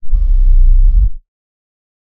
machination-dry
Could be used as moving sounds for something large or machine-like.